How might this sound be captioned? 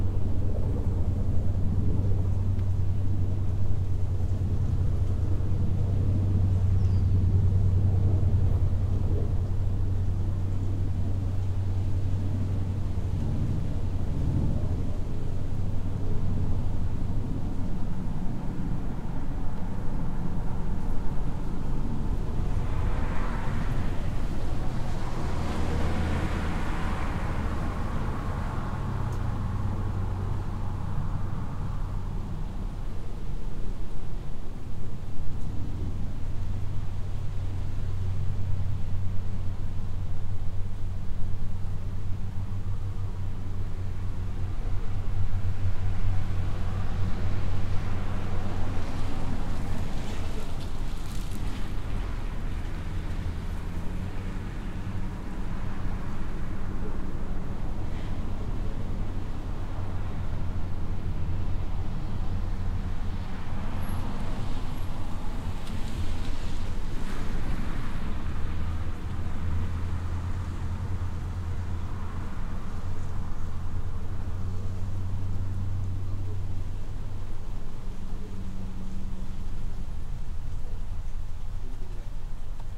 Neighborhood ambiance with a distant helicopter in the sky. Light wind, small birds, and minor vehicle pass by's.